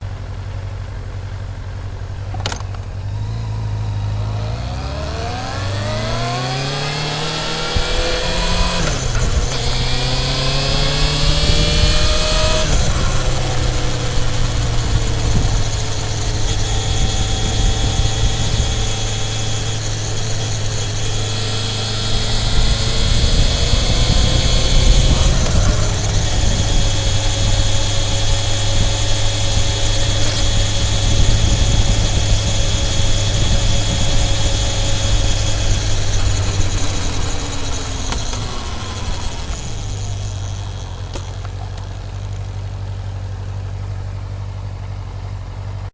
Motorcycle chain & gear box
A short clip of a recording I made from my CBF600 motorcycle gearbox and chain.
You can hear gearbox wine (normal), the sound of the chain running over the drive sprocket, some gear shifts and a little wind noise.
I recorded it to see what it sounded like. It can make a good reference with which to compare should you think there is a problem in the future. I like the sound of the engine running and gears, normally drowned out by the exhaust.
I clipped the mic to the gear lever. If I do it again I will place it further away from the sprocket.
Bike, chain, gearbox, Motorcycle, ride